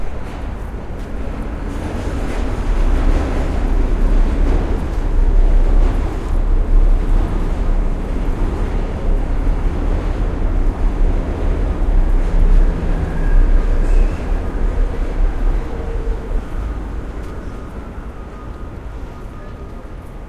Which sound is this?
City Passing Subway Train at the Otherside of the Station
city, station